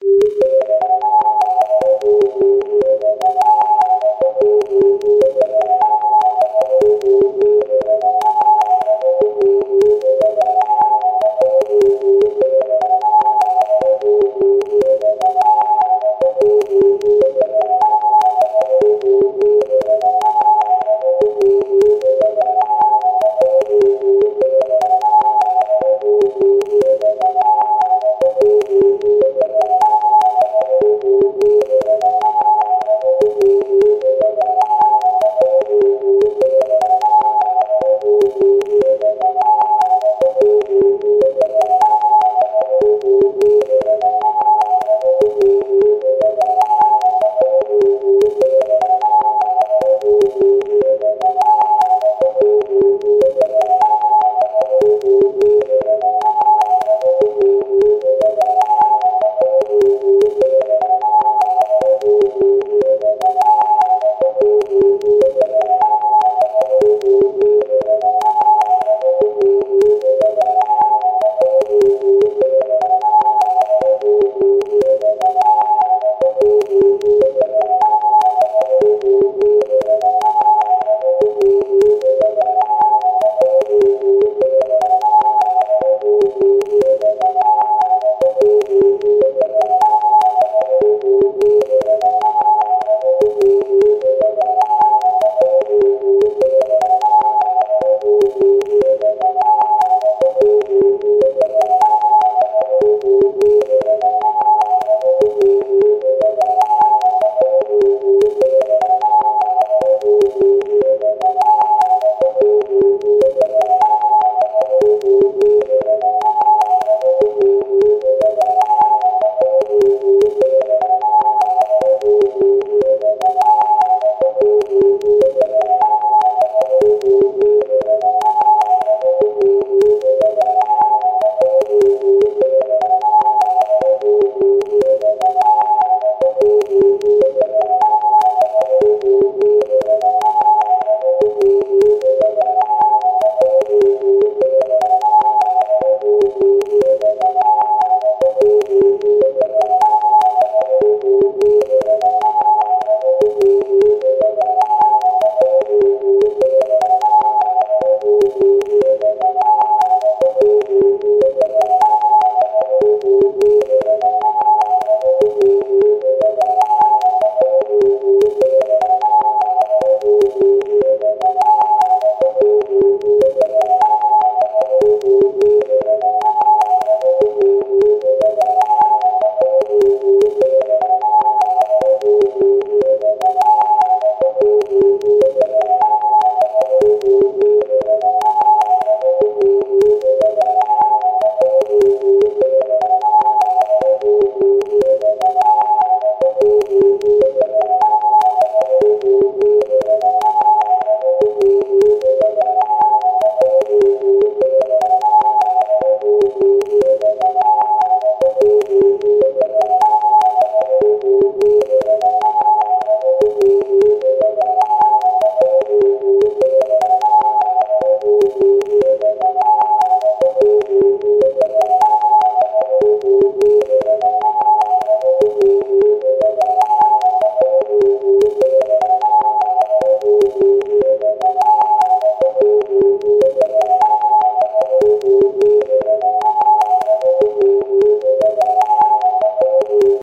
Ascending and descending frequencies 05 - Reverb
Created using Audacity
200ms intervals